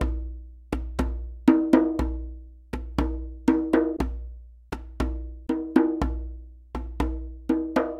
djembe grooves fanga 120bpm
This is a basic Fangarhythm I played on my djembe. Recorded at my home.
africa, djembe, drum, ghana, percussion, rhythm